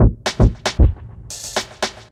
Drumloop 02 114bpm
Roots onedrop Jungle Reggae Rasta
Roots, Rasta, Reggae, Jungle, onedrop